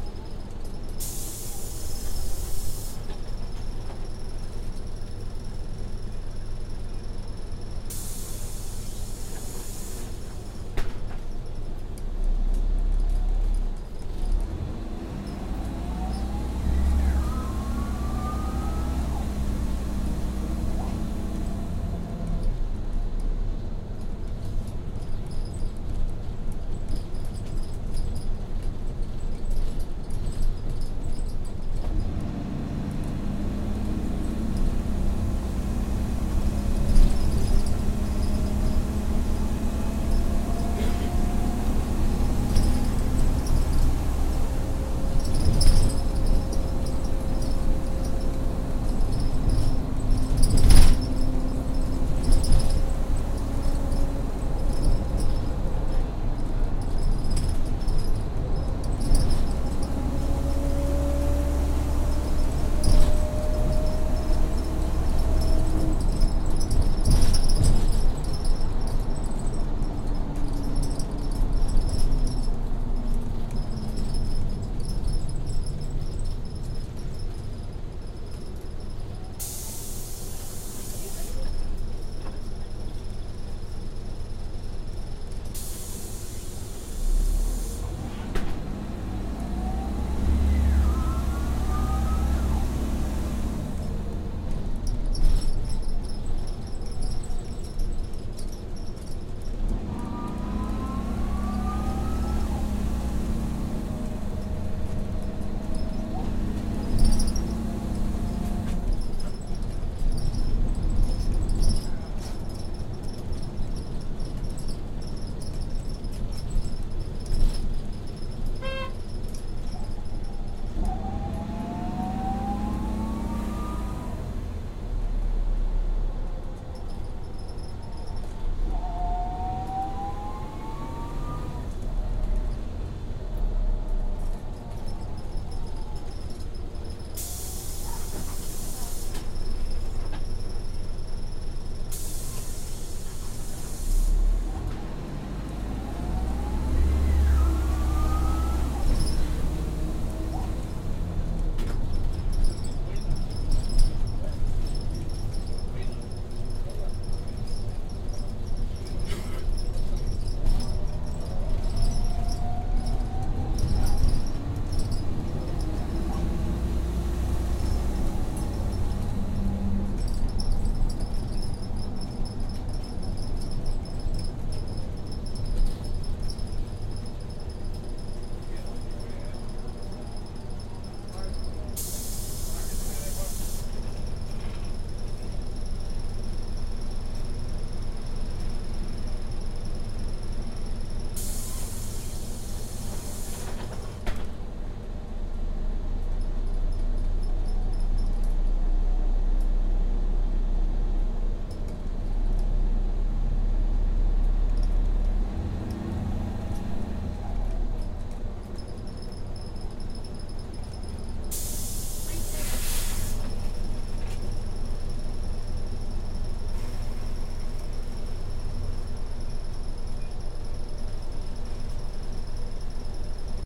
BUS TRAVELING THROUGH THREE TOWNS edit 01
Recorded using a Zoom H4n, using built in stereo mics. The continuous rattle is from a loose hand rail on the bus. This is the number 12 bus traveling between the towns of Saltcoats and Ardrossan both in North Ayrshire Scotland. Recording started and stopped inside the bus.
engine, hydrolics, field-recording, interior, voices, bus, rattle, transportation, vehicle